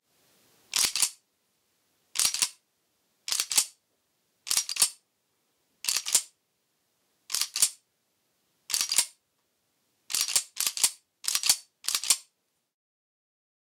Racking slide on 1911 handgun.